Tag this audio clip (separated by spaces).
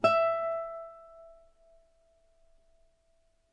string strings music e guitar